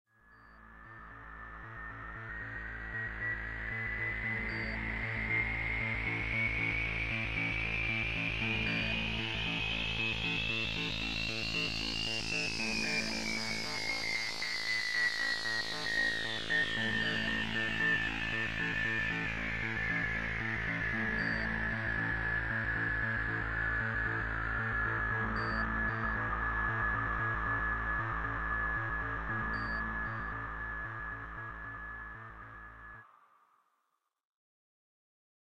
UFOs over Antarctica
UFOs are passing over Antarctica.
2 layered malstroem patches, faded in and faded out.